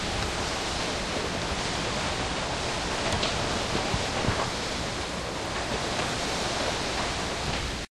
capemay delaware jetty2
Passing by the jetty on the Cape May-Lewes Ferry heading south recorded with DS-40 and edited in Wavosaur.
new-jersey, boat, bay